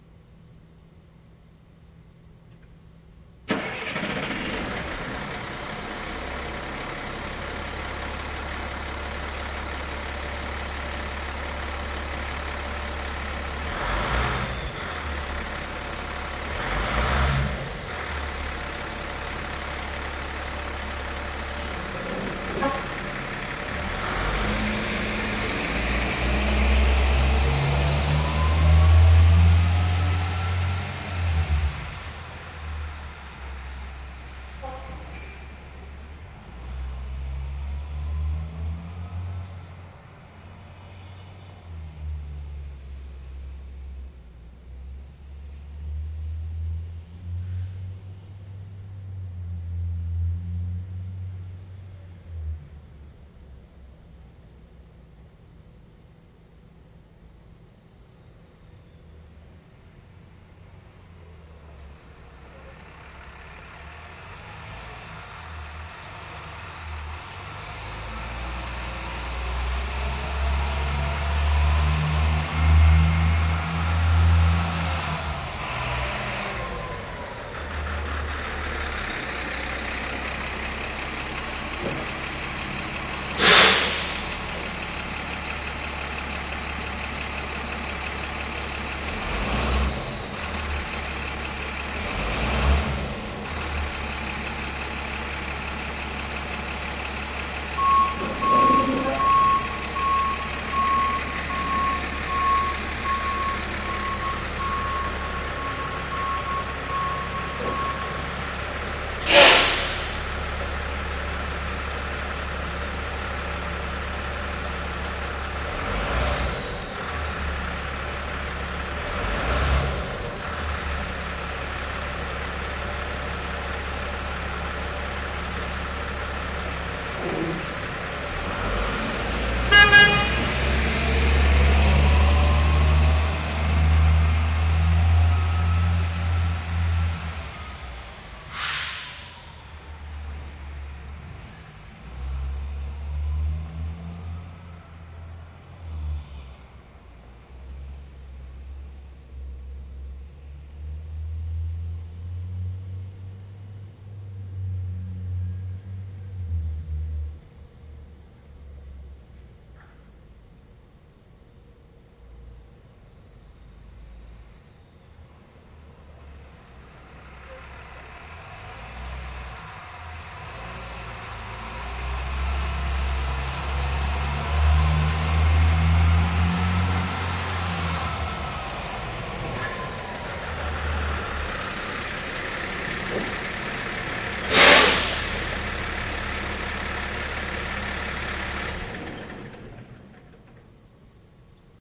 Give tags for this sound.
air-break; parking

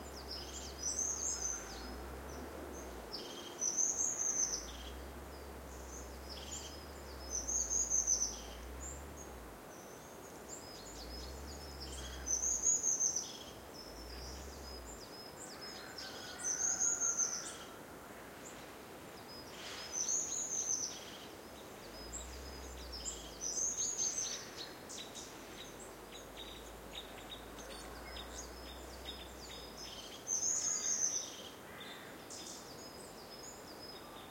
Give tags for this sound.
birds; field-recording; crows; forrest

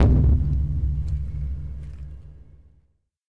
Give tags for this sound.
field-recording; percussion

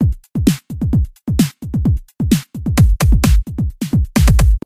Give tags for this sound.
beat; dance; disko; Dj; hip; hop; lied; loop; rap; RB; sample; song; sound

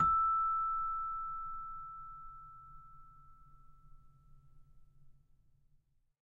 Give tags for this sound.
samples; celeste

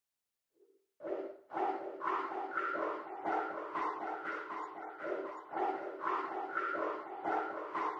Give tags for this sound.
120bpm; swish

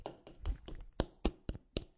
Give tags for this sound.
January2013; Essen; Germany; SonicSnaps

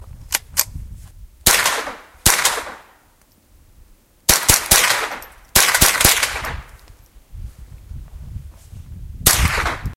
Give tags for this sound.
rifle; gun; impact; target; suppressive-fire; report; field-recording; 22; fire; suppressive

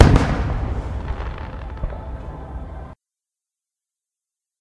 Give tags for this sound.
ambience; hit; fall; dust; falling; fire; fireworks; explosion; distant

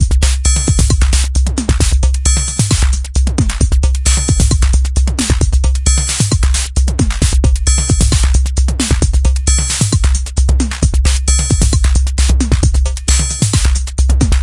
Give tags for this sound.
loop; 133bpm; electro; drumloop

